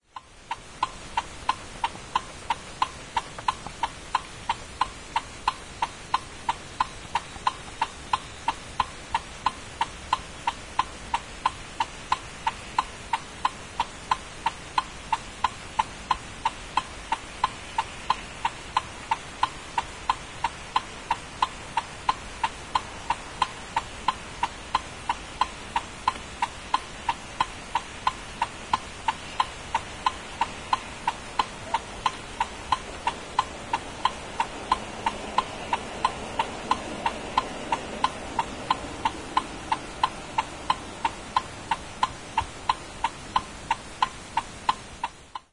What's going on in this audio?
01.12.09: about 18.00, Św. Marcin street in the center of Poznań (Poland). Short recording of blinkers from inside car perspective. I stayed inside the car while my friend was depositing money.
no processing only fade in/out
blinker, car, poland, parking-lights, field-recording, indicator, poznan